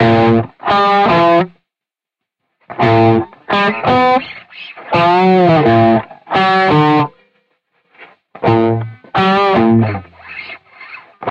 RH PunkyGuitarLoop(BPM85)
Original punky guitar loop
punk, chords, Guitar, grunge, solo, modern, loop